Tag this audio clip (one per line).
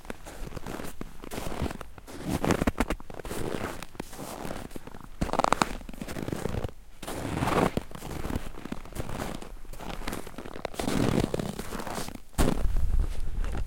hard
snow